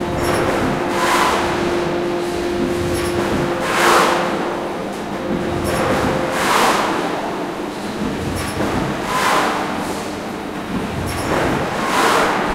Field recording from Whirlpool factory in Wroclaw Poland. Big machines and soundscapes